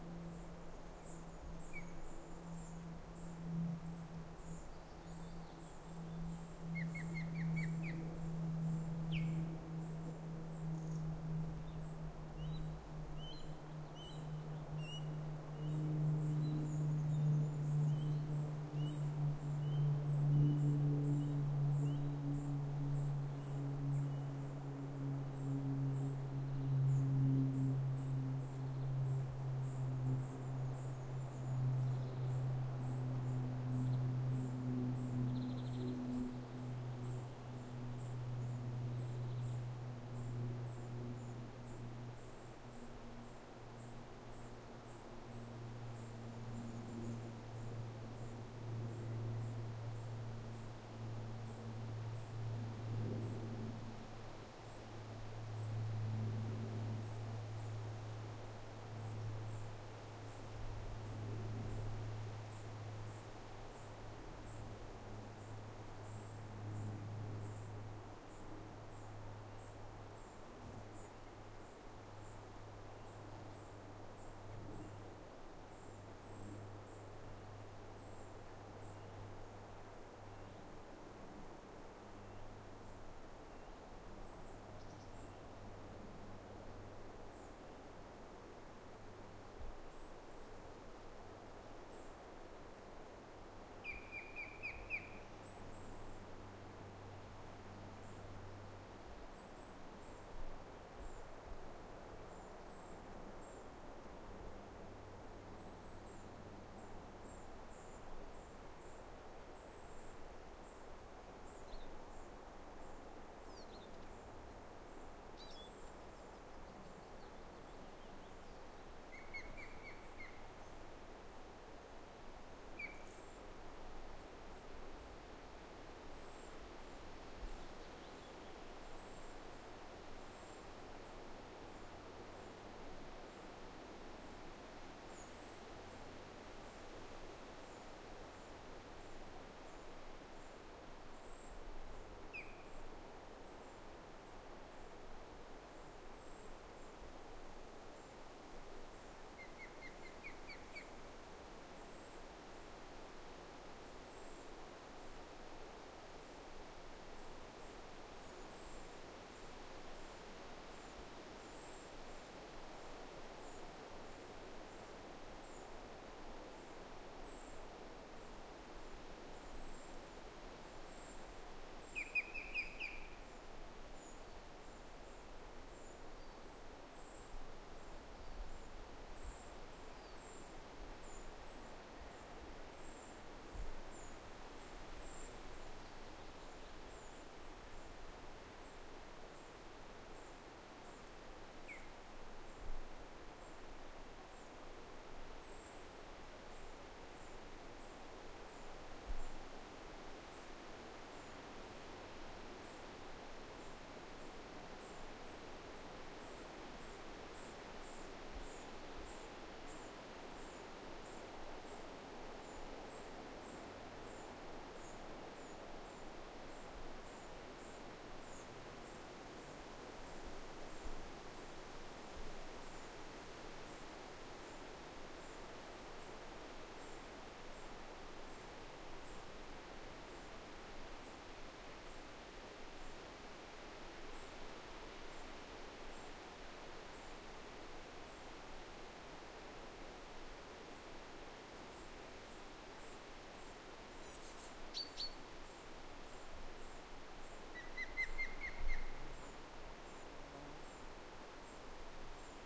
Thanks Graeme for telling me: well not so far from where I stay this
pair of Ospreys had their nest on top of a pine tree near the River Tay in Perthshire.
I recorded this with an AudioTechnica microphone AT835ST, a Beachtek preamp and an iriver ihp-120 in June 2008.
aguila-pescadora, balbuzard, field-recording, fischadler, osprey, pandion-haliaetus, perthshire, scotland